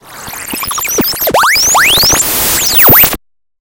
Attack Zound-172
Strange electronic interference from outer space. This sound was created using the Waldorf Attack VSTi within Cubase SX.
electronic, soundeffect